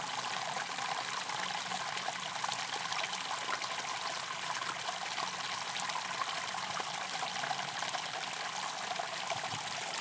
little river
h4n X/Y